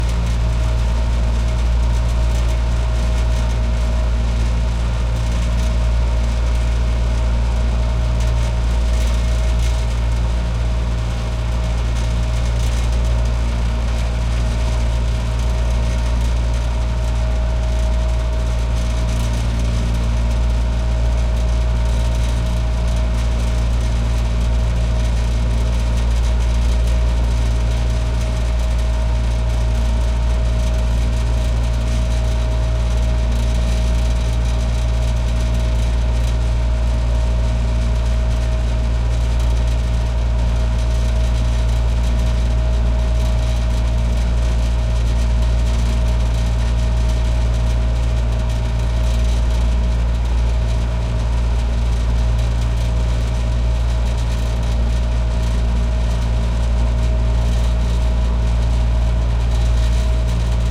The old, ragged ventilation-unit of a Beach House on Bimini Island
Recorded with a Sony PCM-M10